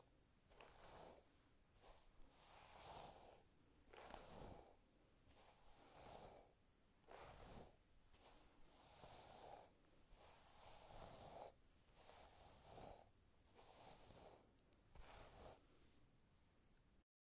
Paddle hairbrush through hair
A paddle brush used to brush long hair, recorded with a Zoom H6 with an XY capsule.
Brush, Hair, Paddle-brush